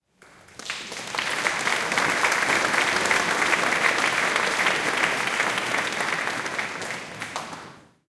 The second recording from an event in my town's church.
recording device: Canon XM2 (GL2 for the US)
editing software: Adobe Audition 3.0
effects used: clip recovery, normalization